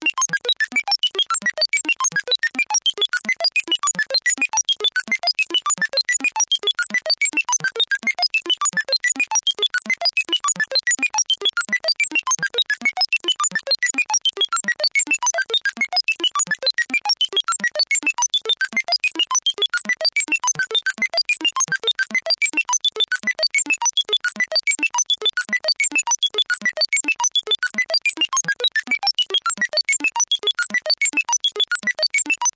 The way computers/calculators sound in cartoons...recreated on a Roland System100 vintage modular synth.